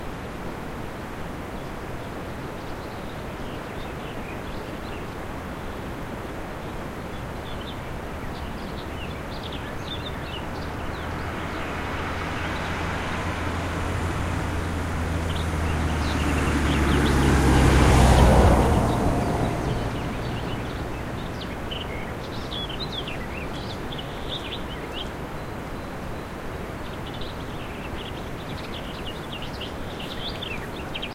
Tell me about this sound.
A car passing on a dirt road in the woods with a river close by and birds chirping